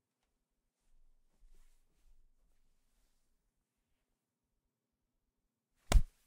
Body Hits 2 03
Body hit like a punch or smack
impact, hit, thud